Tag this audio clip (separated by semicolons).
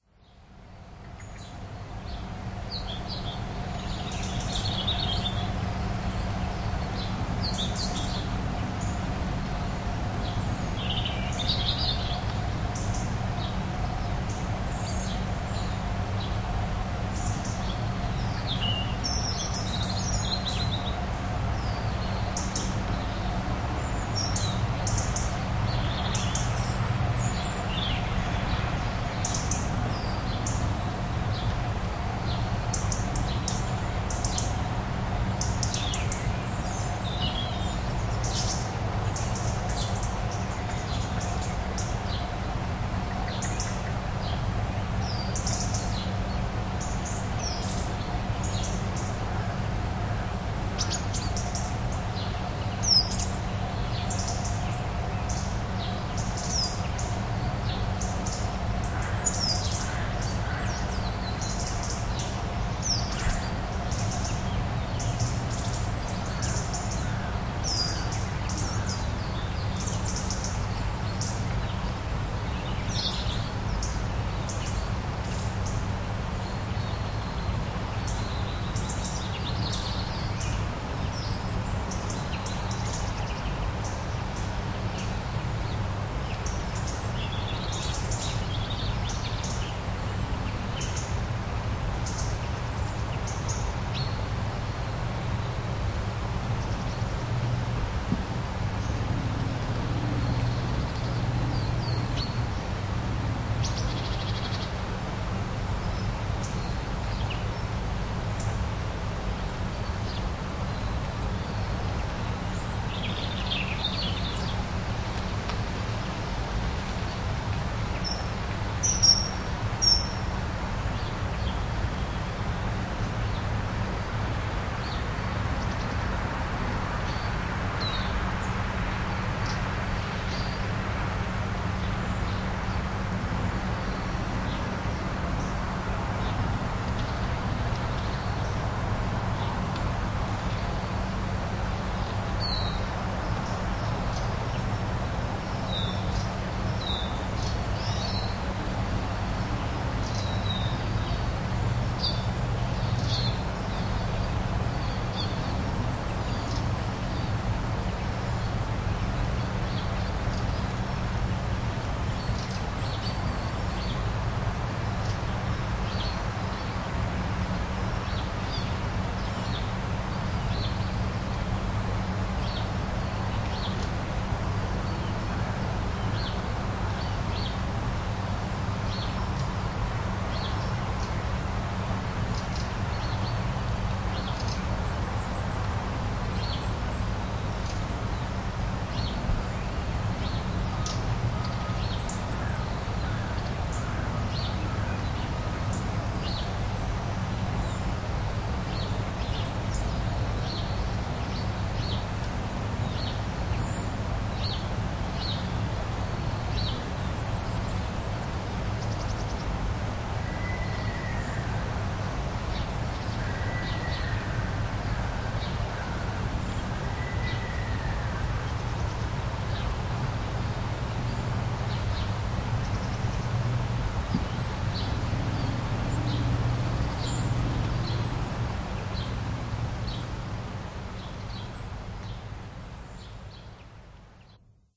birds; field-recording